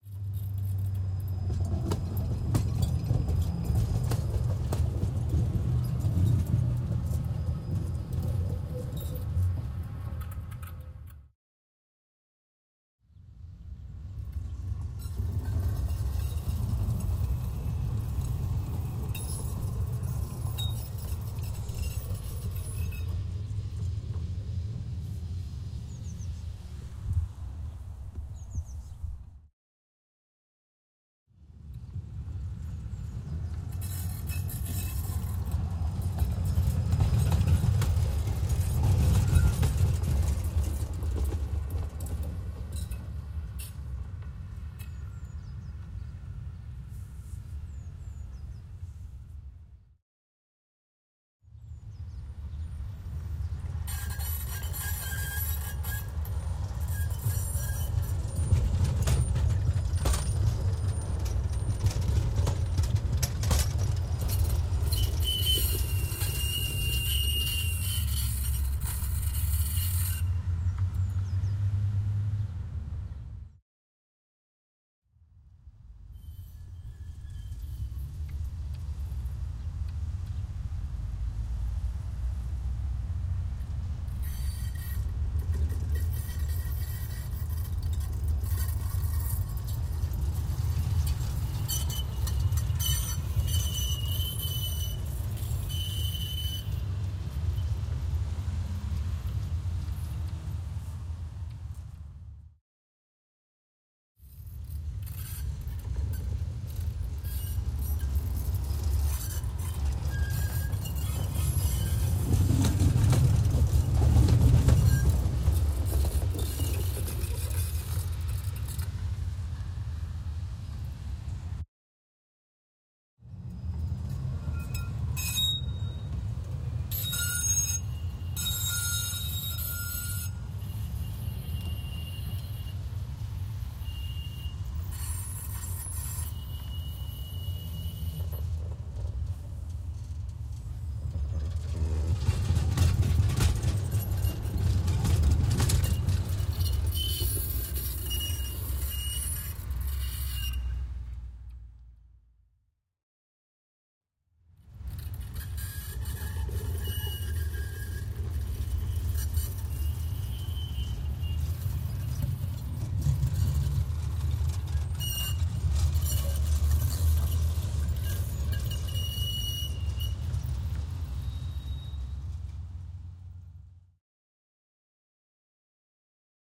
Tram sound brakes
Sound of tram on the turn. Brakes squeals.
brakes, train, tram, tramway, transport